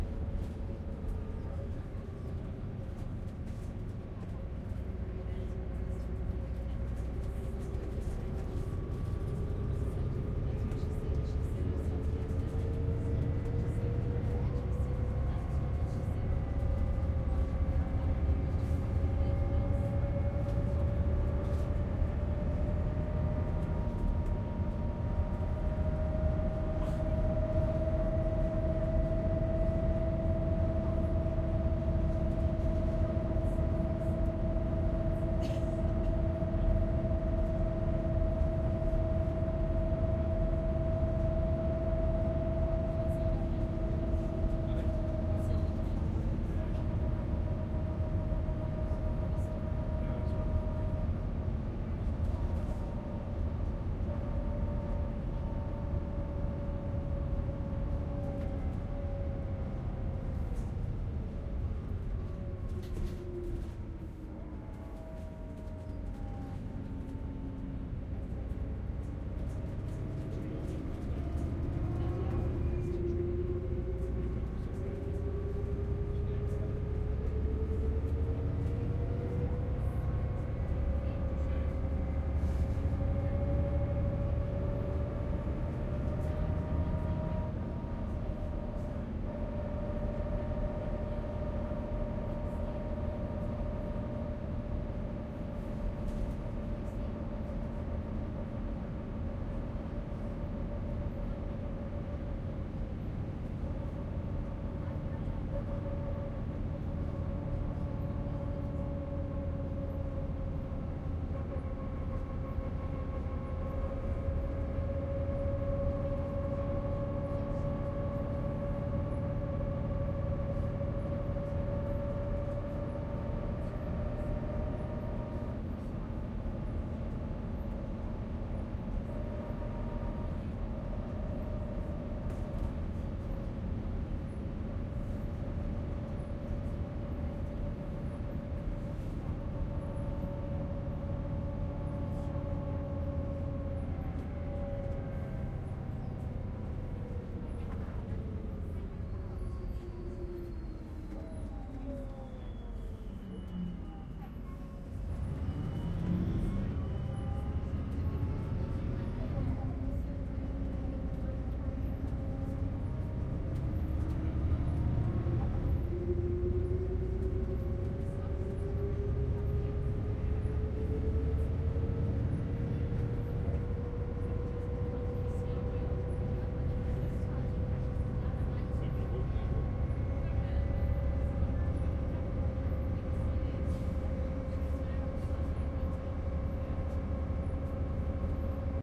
Bus on Freeway
Not so interesting, but may prove useful for someone. Sound of a diesel bus on the freeway. Lots of gearbox whine in this one. Bus slows for roundabouts. Max speed ~ 80 km/hr. Recording chain: Panasonic WM61-A home made binaurals - Edirol R09HR recorder.
passengers, gearbox, engine, accelerate, transport, gears, highway, motorway, bus, whine, road, motor, freeway, sunbus, palm-cove-cairns, coasting, decelerate